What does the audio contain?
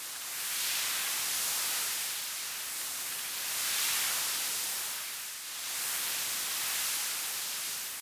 Sound of sand friction.
This is a mono seamless loop.